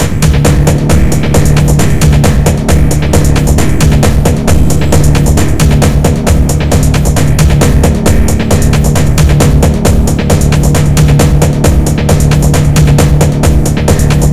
this is a fat drum sequence. made with reason.
hope u like it! have fun with it!
greetings from berlin city! _rob.
bass, drum, drumloop, drums, electro, loop, sequence, synthetic, tekno, trance